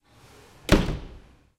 Door closing
The door of the toilette closing.
UPF-CS12, toilette, close